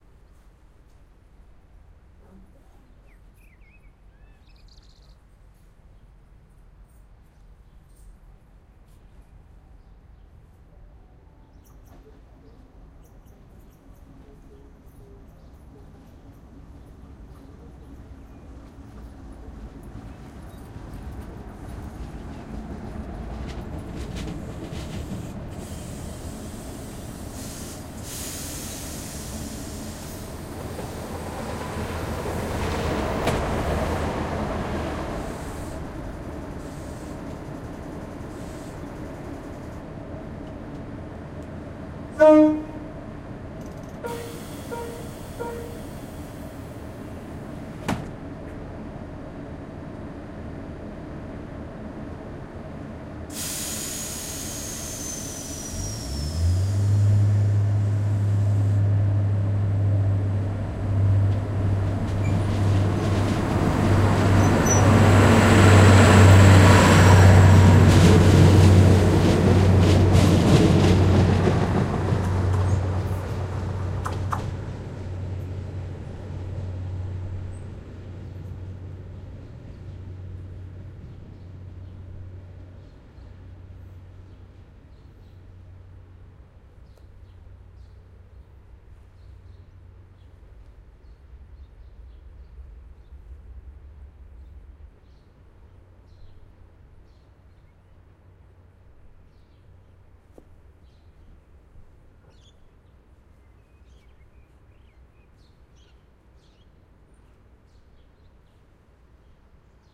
Diesel train arrives and departs 2
Diesel passenger train arrives at station and stops, another behind it passes through on the other side of the track. The doors open and close, horn beeps and the train departs. Birds are audible at the start and end.